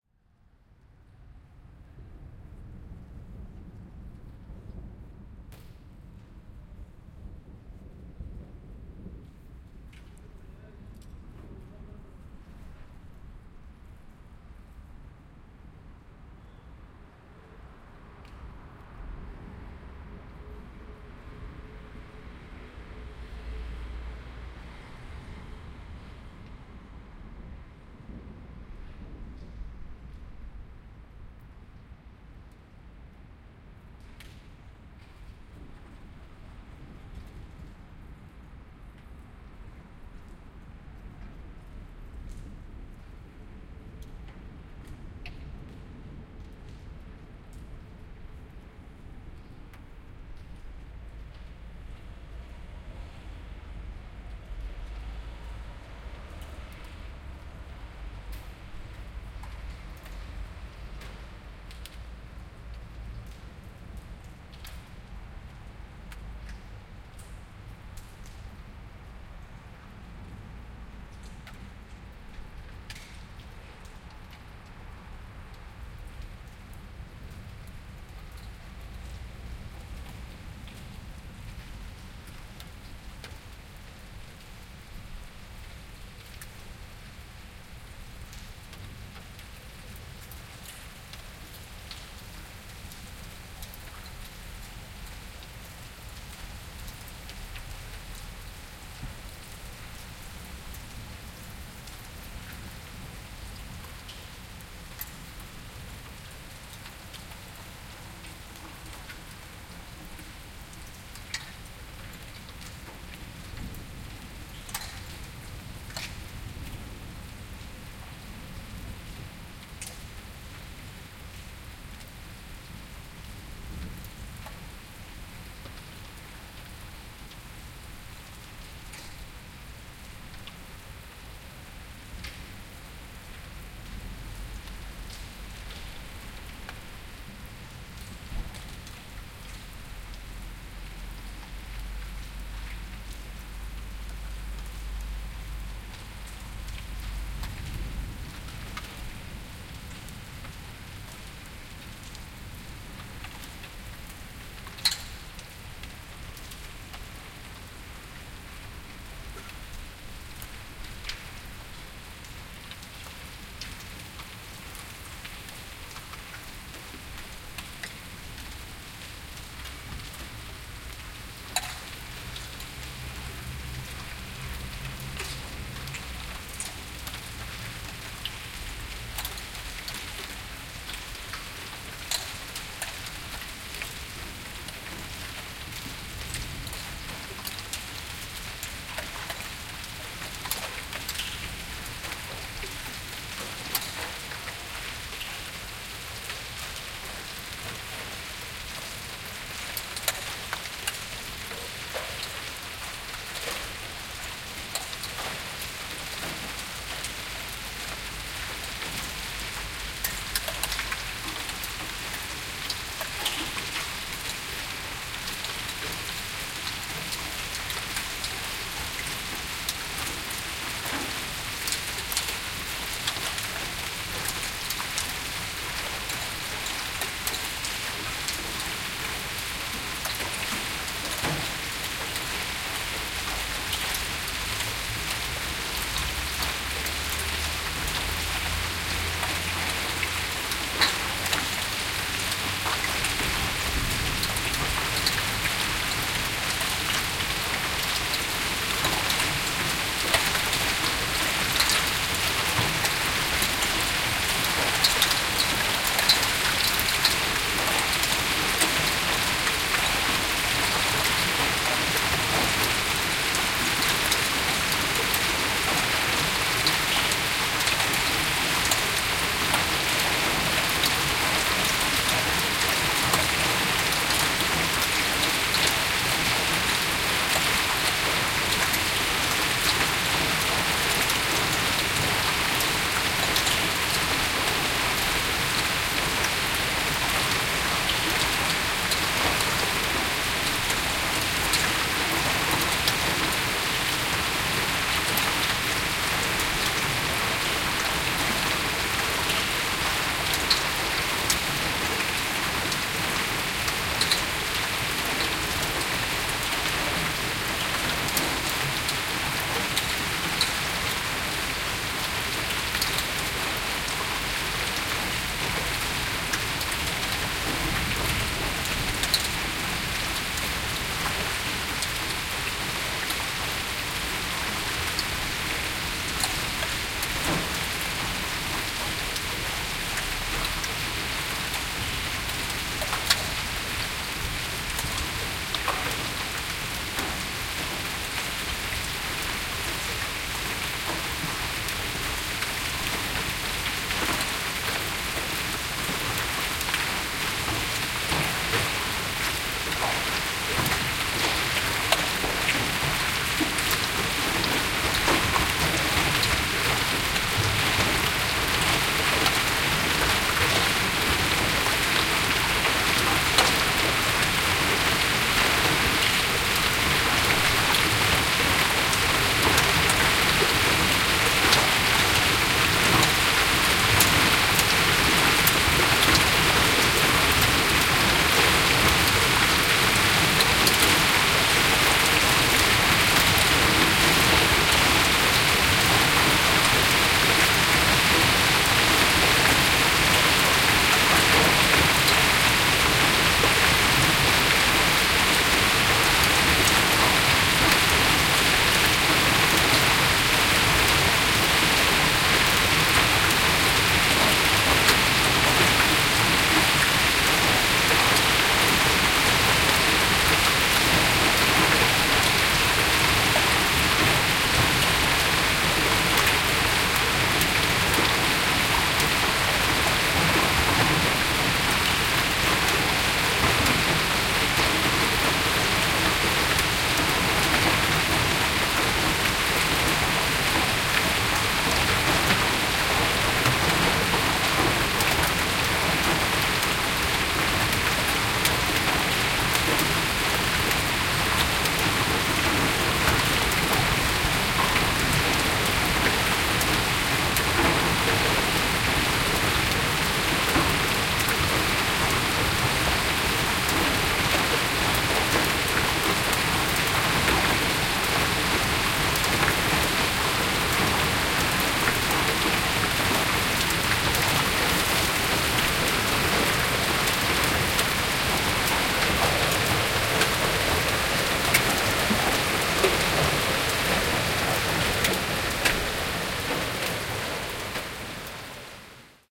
PARIS Hailstorm 2014-06-09@1am
Binaural recording of a Hailstorm recorded from my appartment in the 20th district of Paris (FR) (9th of June 2014, 1am)
OKM with Nagra Ares II.
HENCE LISTEN WITH HEADPHONES!
ambient, paris, thunder, Hail, nature, storm, rain, weather, Hailstorm, ambiance, lightning, thunder-storm, binaural, field-recording, HRTF, thunderstorm, city, OKM